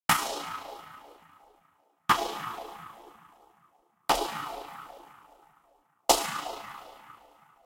Sk7 ff shootboing
Shoot with a layered boing effect.